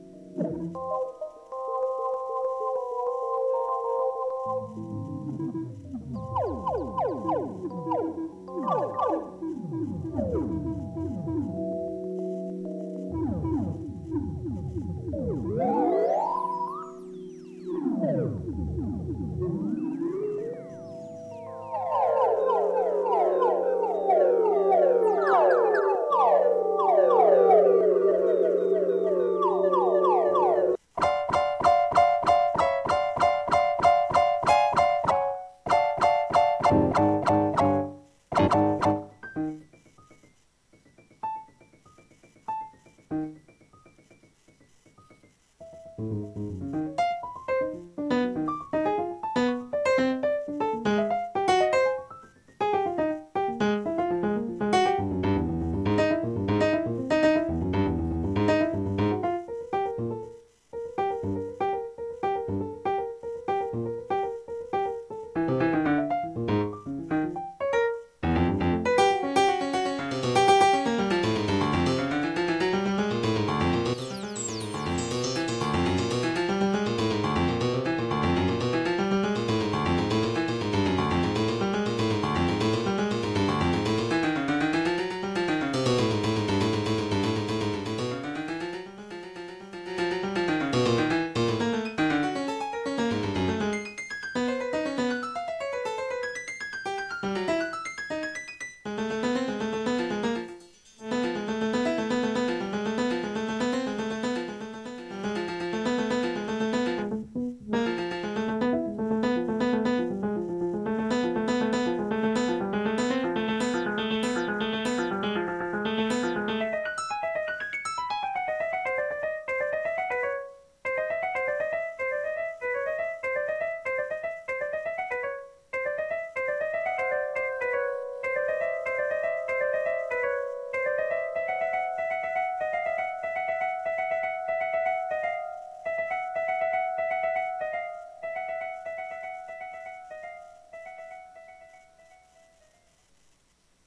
By using a frequency filter by setting its Oscillations in variable arrays on the piano preset - I was able to make most of these sounds. Also vocoding and feedbacks and feed throughs were happening. then they were altered in audacity.